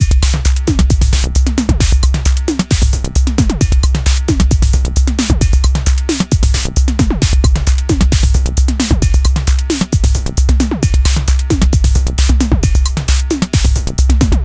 133 bpm ATTACK LOOP 04 electrified analog kit variation 10 mastered 16 bit
This is loop 10 in a series of 16 variations. The style is pure
electro. The pitch of the melodic sounds is C. Created with the Waldorf
Attack VSTi within Cubase SX. I used the Analog kit 2 preset to create this 133 bpm loop. It lasts 8 measures in 4/4. Mastered using Elemental and TC plugins within Wavelab.
loop, electro, drumloop